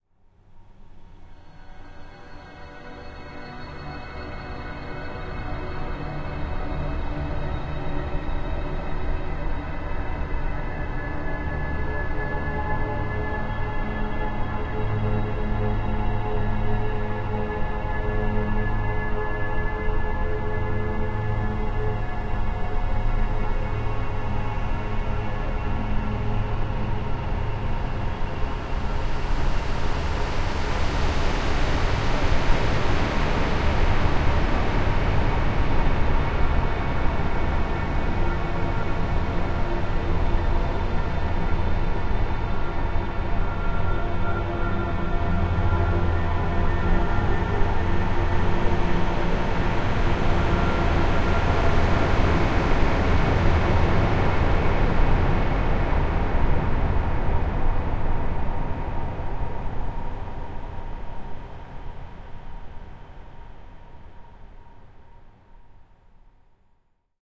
FX Naru Flux
dark, sounddesign